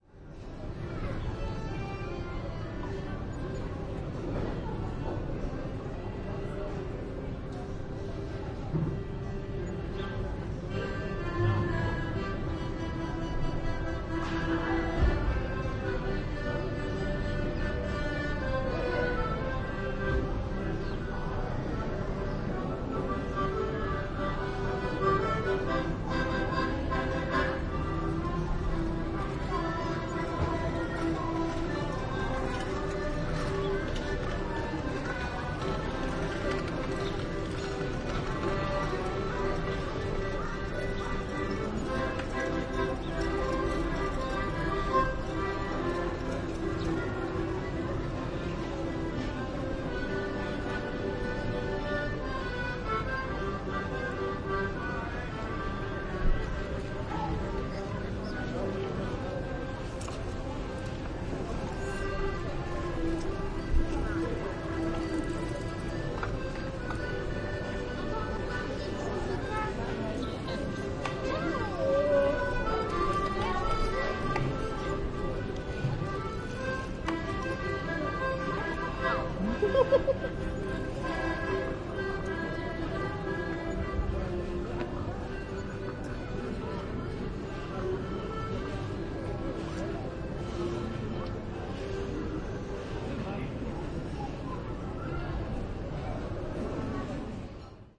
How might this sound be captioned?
Medium City Square Summer Sunday Afternoon 2
Ambience sound of the medium city square in the summertime.
musician, city, calm, afternoon, town, summer, Sunday, square, accordion